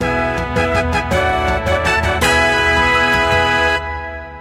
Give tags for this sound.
computer
game

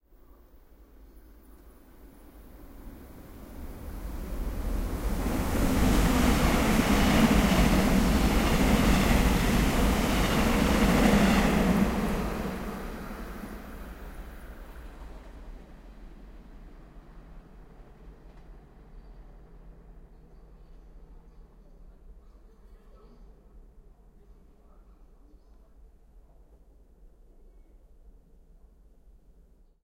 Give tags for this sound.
seoul
korea
field-recording
metro

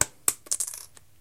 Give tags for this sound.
rock
stone

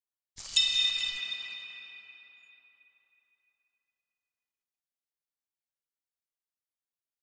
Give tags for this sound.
bulb
eureka
idea
inspiration
light

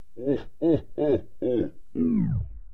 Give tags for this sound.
2
laughter
odd

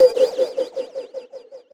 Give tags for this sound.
jungle
audio
fx
sound
effext
sfx
beat
pc
game
vicces

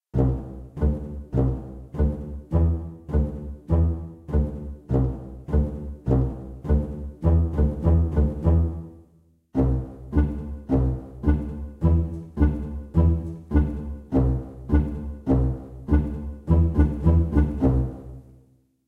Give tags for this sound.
funny
joking
comedian
peculiar
comical
cartoon
film
droll
odd
blasts
Rockets
background-sound
background
shot
animation
fairy
comic
toon
fun
cinematic
boom
movie
guns
orchestral
hollywood
joke

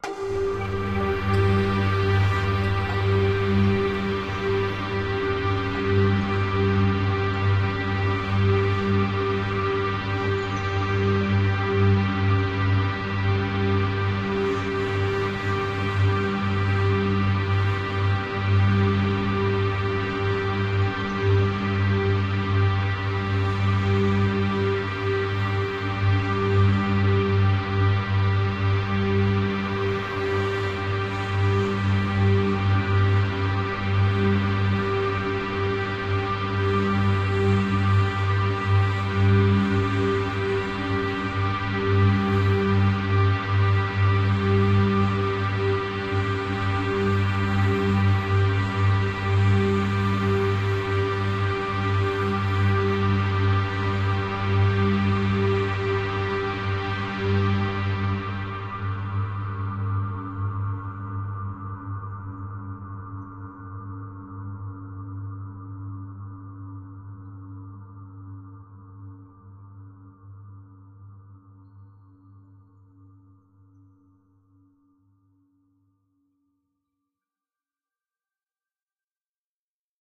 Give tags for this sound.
artificial
drone
multisample
organ
pad
soundscape

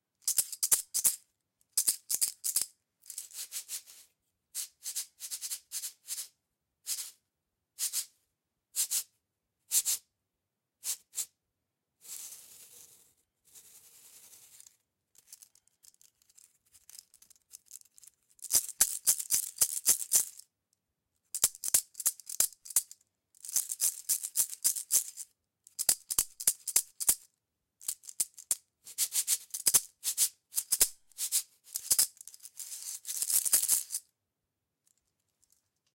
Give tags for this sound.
percussive
toy
seed
percussion
container
shaker
percussion-loop
rhythm

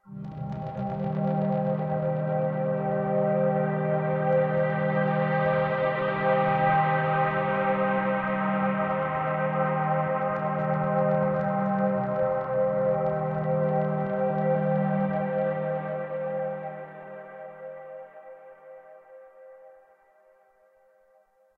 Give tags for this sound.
ambient
bass
pad
sample
soundscape
space

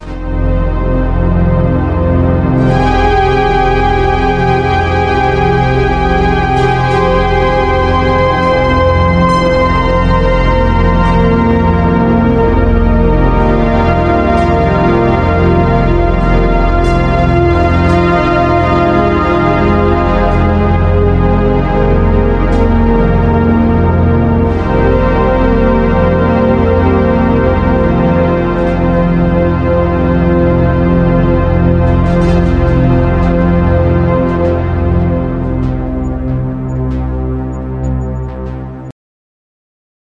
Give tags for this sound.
ambiance
ambience
atmosphere
background
cinematic
dark
drama
dramatic
emotional
ethereal
film
foreboding
fragment
intro
moody
movie
music
pad
sad
suspense
synth
tragic
video

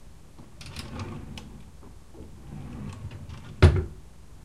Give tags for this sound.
closing
drawer
dry
long
machine
noise
opening
pulley
simple
wood

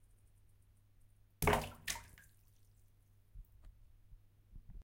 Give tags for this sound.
water
stone
river

